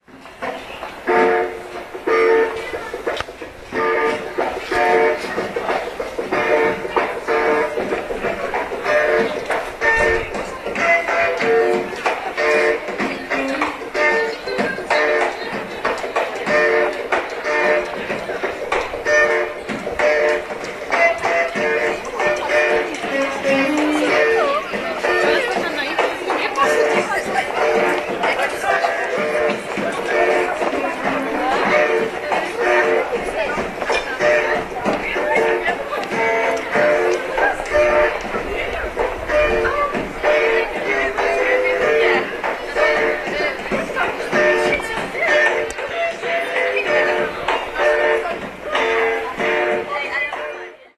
01.10.10: about 20.00. music played by street musician on Polwiejska street in the center of Poznan/Poland.